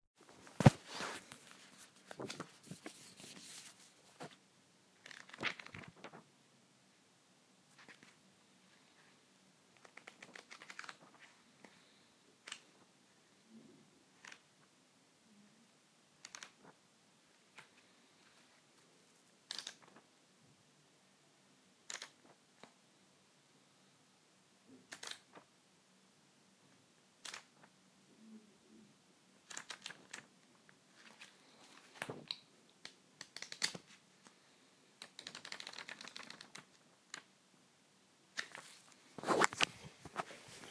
Sound of ruffling through a magazine.